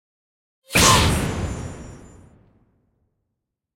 FUN-EXPLOSION03
A fun hit I used for an "instant" explosion in a 2D animation. Hey!